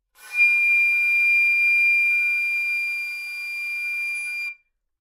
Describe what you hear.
Part of the Good-sounds dataset of monophonic instrumental sounds.
instrument::flute
note::G
octave::5
midi note::67
good-sounds-id::470
dynamic_level::mf